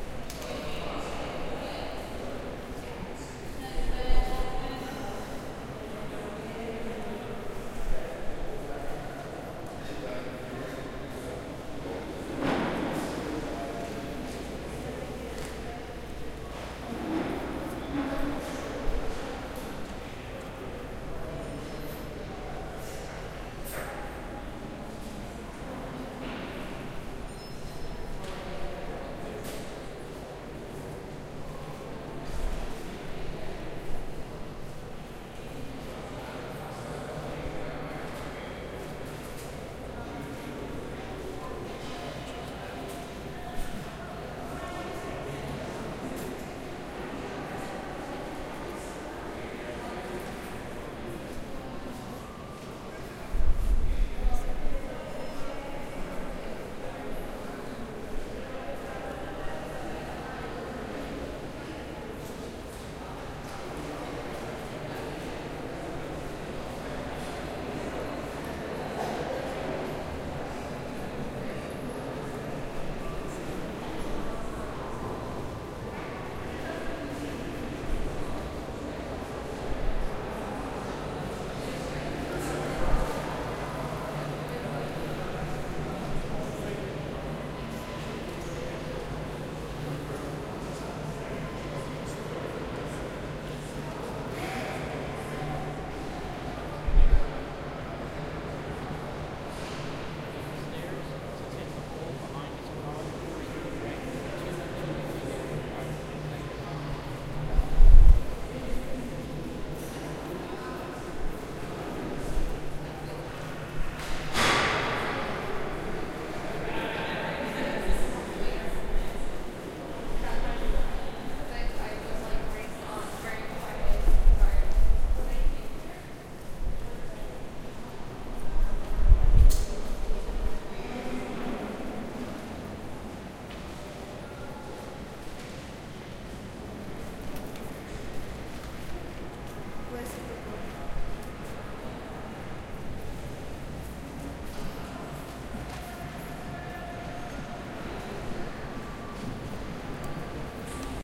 Grand Central Station, New York